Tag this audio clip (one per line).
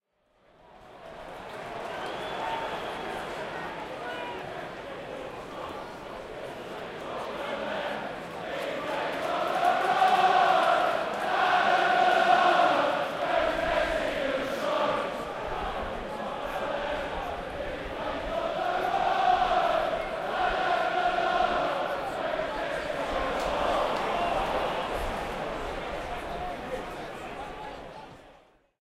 Cheer
Southampton-FC
Boo
Large-Crowd
Football-Crowd
Football
Stadium